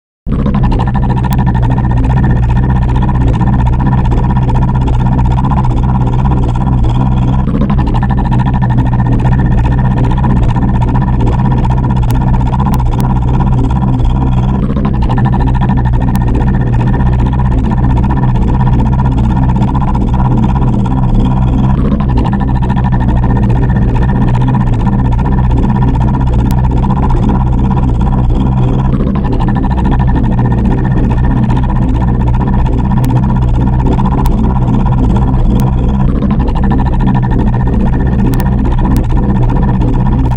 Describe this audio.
The extremly long ships that traffic Danube have Engines with a characteristic sound. I don't know what Engines they have but here is a 135 meters long vessel, rather newbuilt and just now filled by some stuff like sand.
Engine, ship, river, boat